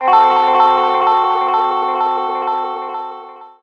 GT2 DMajorBssC
Triad Dmajor/C chord played with an electric guitar with a bit of distortion and a strong short-time delay.
chord, dmajor, guitar, major, processed